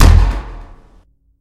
minigun/rifle sound that can be looped fairly well
game, minigun, video, weapon